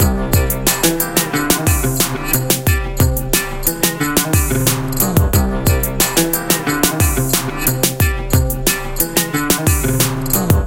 country song001
country, loop, synthesizer, hip-hop, dubstep